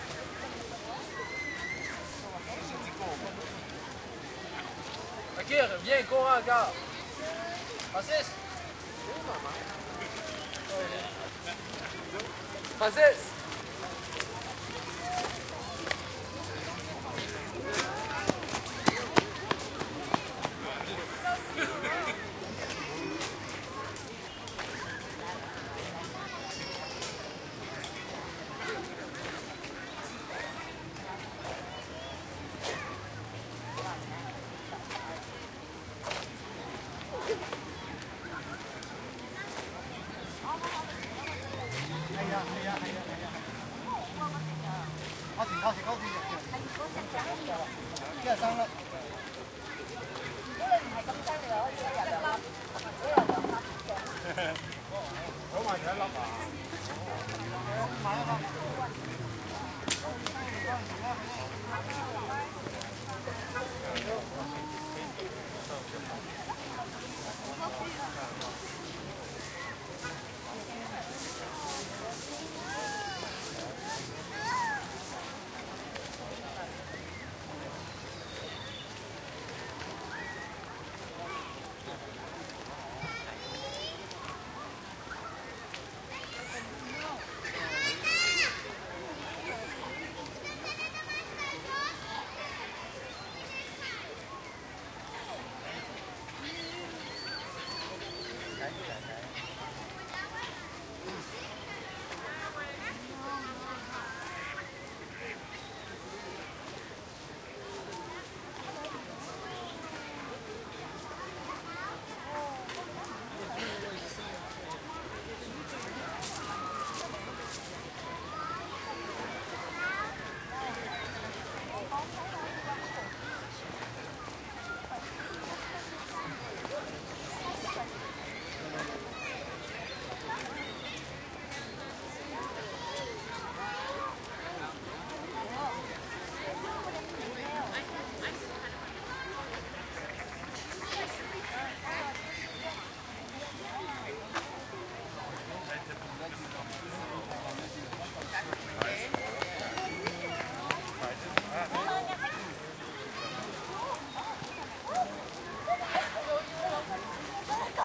crowd ext park light Verdun, Montreal, Canada
Canada, crowd, ext, light, Montreal, park